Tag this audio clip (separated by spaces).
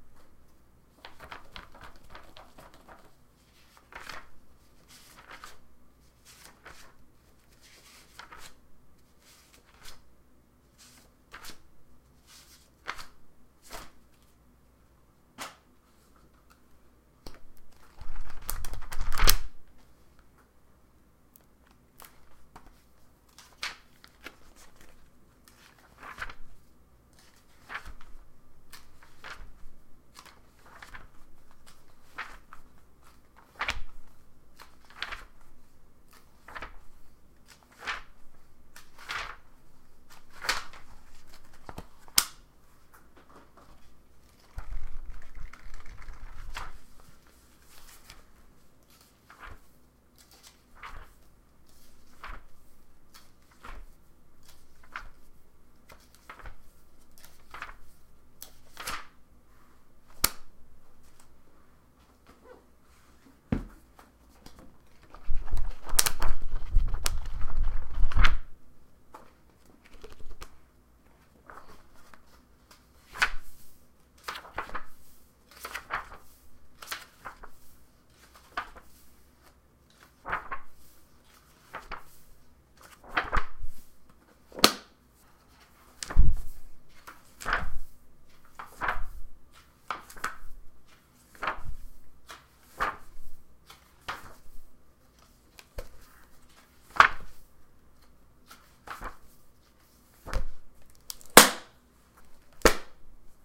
book closing comic-book flipping pages paper sorting